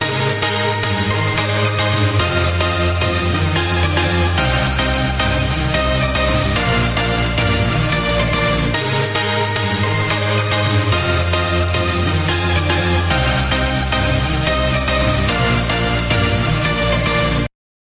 Fierce Loop. Has a very upbeat feel to it.